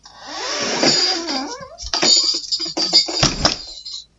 Door closing with bells on handle